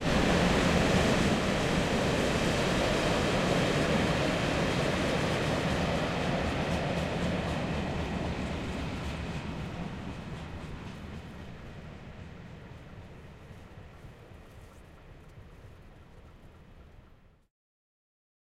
Train Passing By (Krippen)
A train passing by in the area of Krippen, Germany. It's like ab and flow. But with a train!
close-proximity, train, train-passing-by